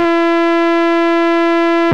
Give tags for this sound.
NoizDumpster
TLR
beep
bleep
computer
TheLowerRhythm
lo-fi
VST

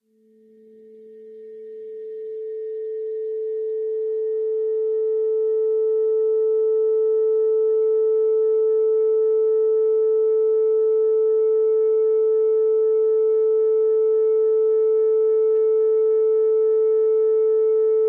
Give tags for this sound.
distorted
distorted-guitar
distortion
extras
guitar
miscellaneous

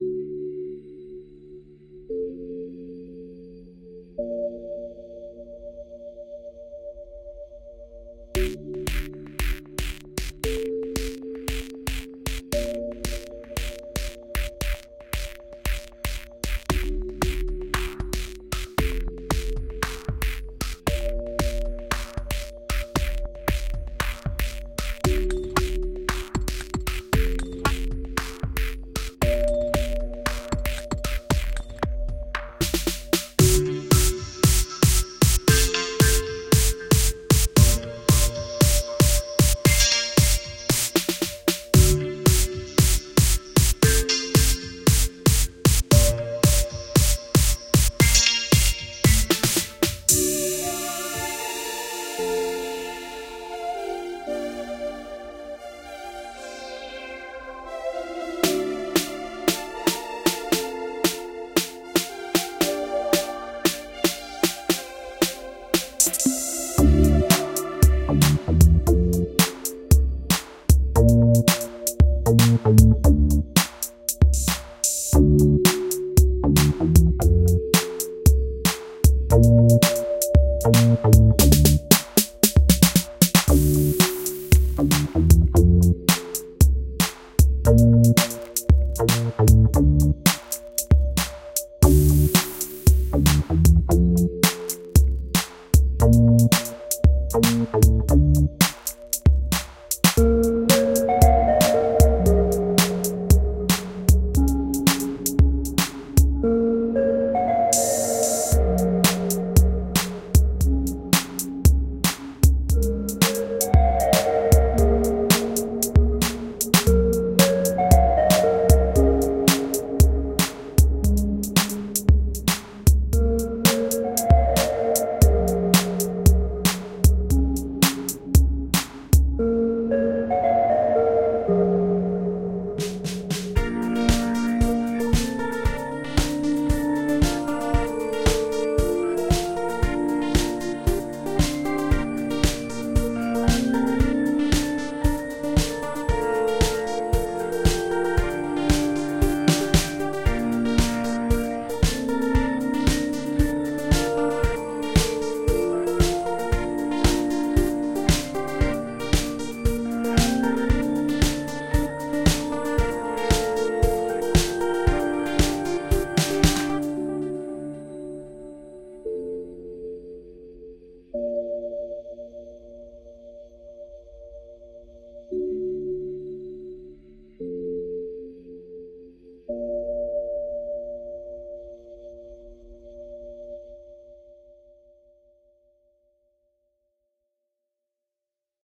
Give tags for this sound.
background
Electronic
music
rhythm
Song
synthesizer

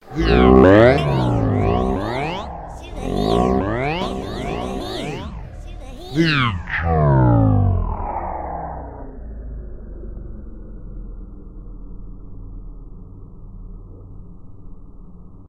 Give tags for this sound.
effects
female
fx
girl
speech
vocal
voice

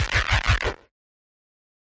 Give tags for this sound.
electronic
nasty
synth

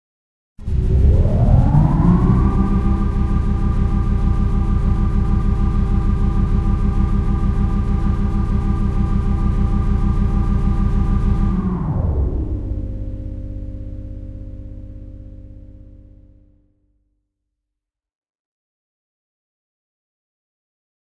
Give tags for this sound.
elevator
engine
industrial
machine
mechanism

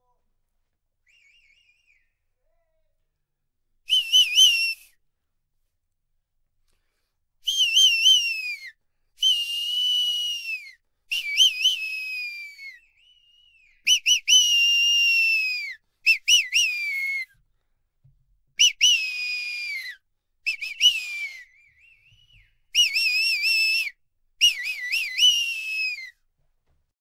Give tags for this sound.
silbido
Whistle

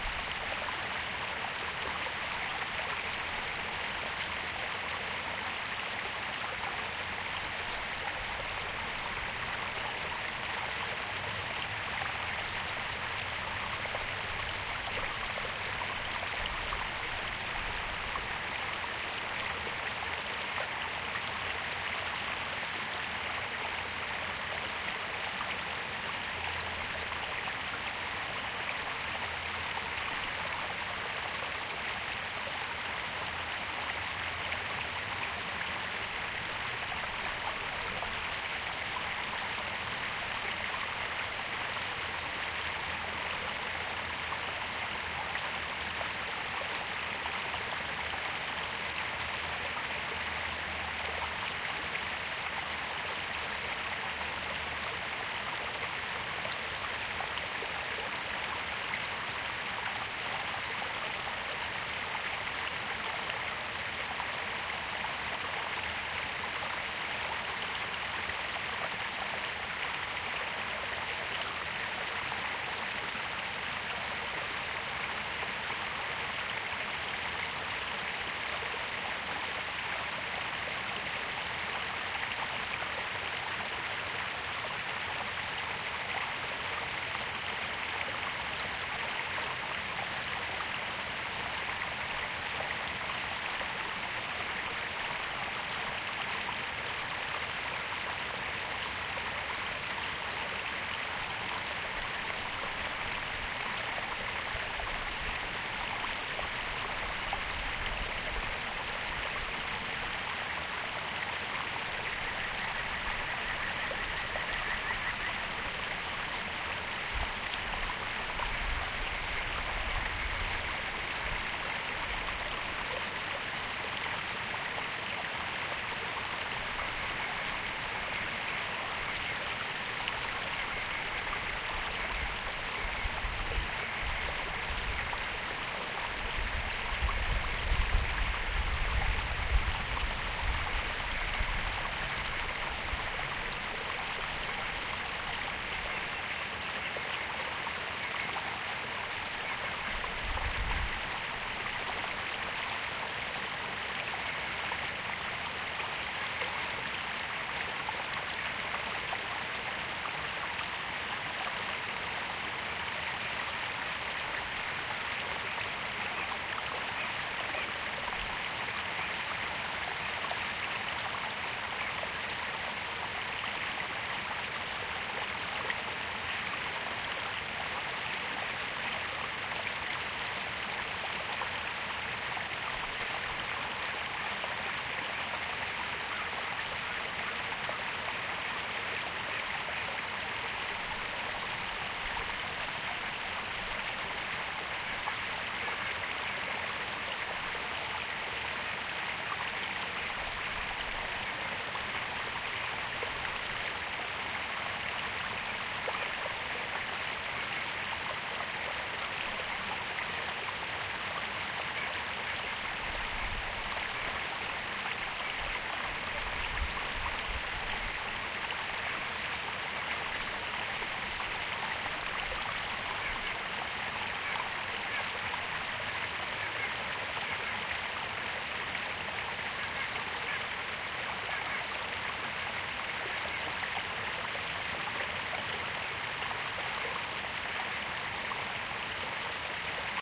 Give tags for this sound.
nature
stream
field-recording

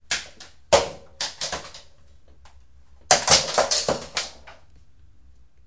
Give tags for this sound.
things
Falling
objets

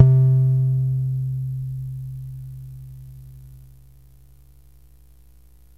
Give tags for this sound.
fm
portasound
pss-470
synth
yamaha

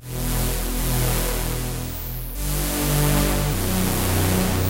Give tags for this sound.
electronic
house
loop
rave
wave

